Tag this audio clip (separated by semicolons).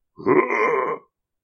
Zombie; Hit